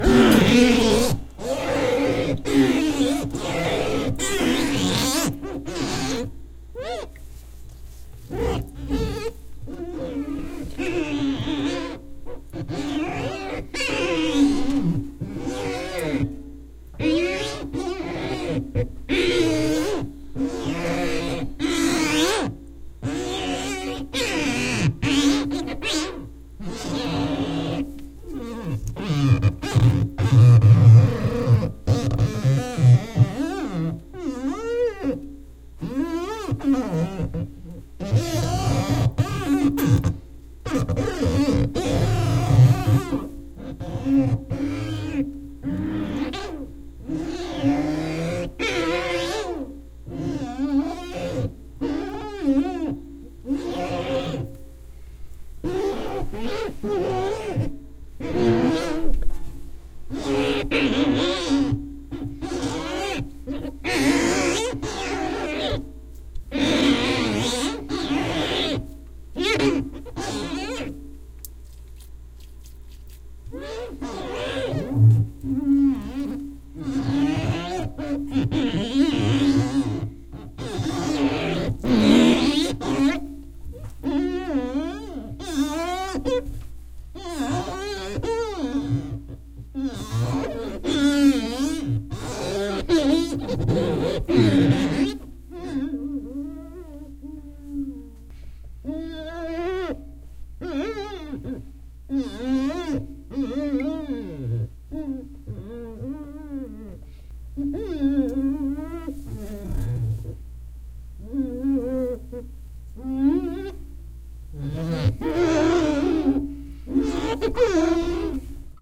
Household, Sink - Rubbing Fingers on Sink Spigot (Shriek, Creature Death, Scream)
The spigot of a metal sink being twisted and rubbed with damp fingers. Possibly good for creature scream or whine sfx.
Friction, Horror, Household, Rubbing, Scream, Tension